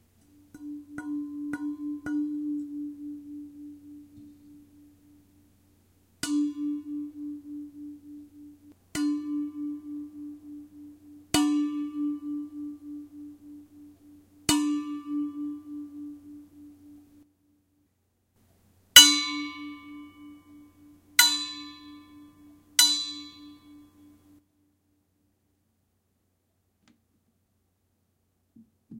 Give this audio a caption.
Striking a Water Bottle
Hitting a half-full insulated Klean Kanteen water bottle with various objects. First short set with my fingertip, second set with the side of my varnished tin Starbucks coffee mug and the third set with the side of a standard 3/8" plastic Sharpie marker.
According to my synth, this is extremely close to, if not spot-on with a D-natural (294 Hz).
aluminum, beat, bottle, container, d-natural, perc, plastic, resonance, resonating, strike, water